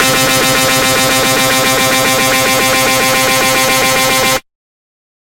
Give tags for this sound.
110 bass beat bpm club dance dub dub-step dubstep effect electro electronic lfo loop noise porn-core processed rave Skrillex sound sub synth synthesizer techno trance wah wobble wub

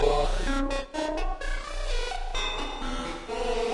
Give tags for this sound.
weird harsh machines processed metal voice rhythm noise industrial loop

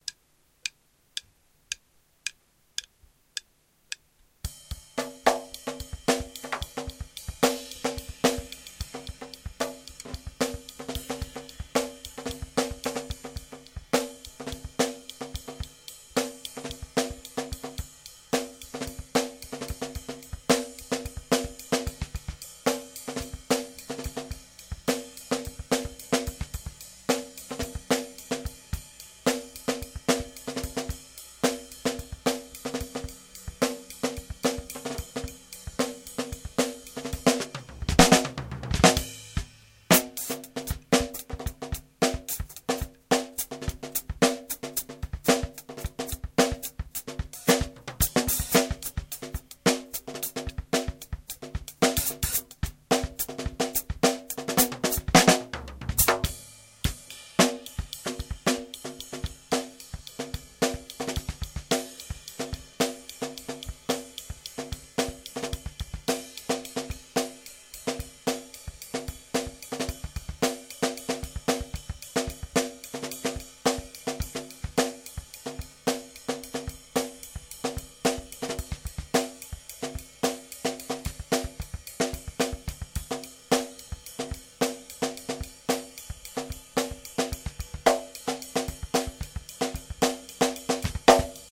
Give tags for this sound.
ace bournemouth download drum free funk jazz london manikin producer robot samples shark space time

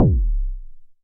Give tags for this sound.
Analog Modular

